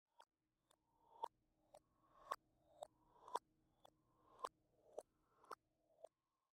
Me making a tick tock noise with my tongue, manipulated by reverse and speed/pitch changes.